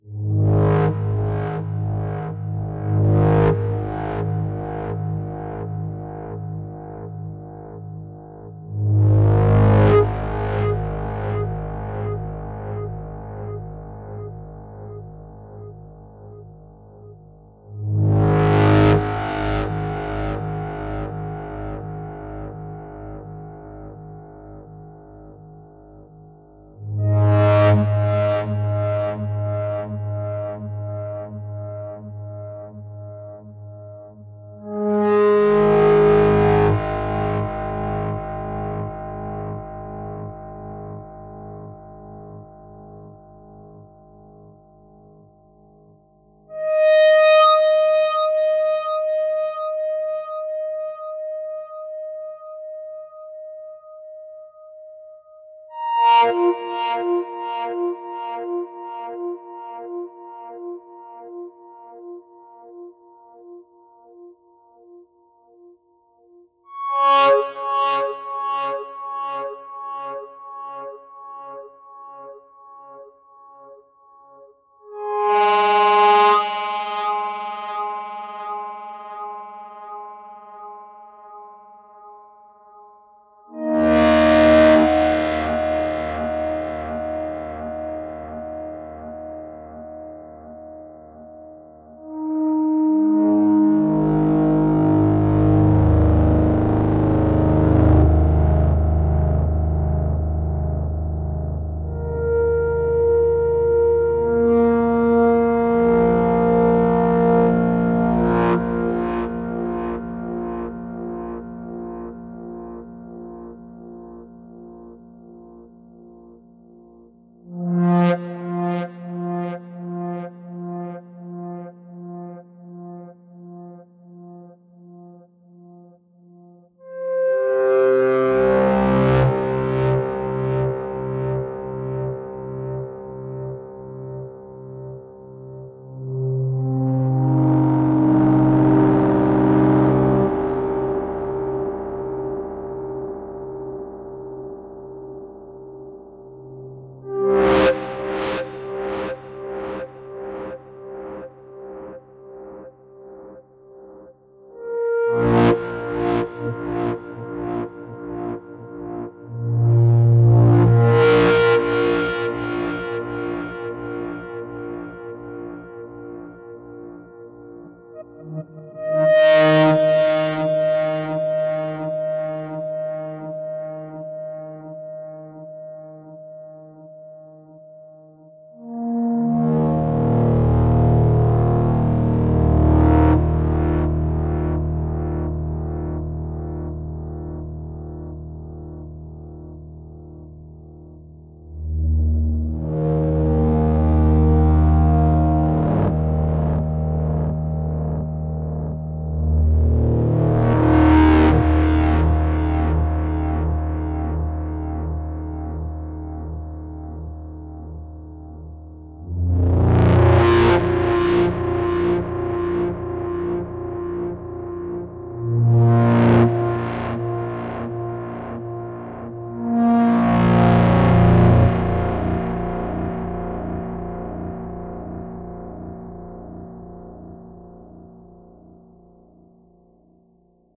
Distorted and echoed flute phrases played on my Casio synth.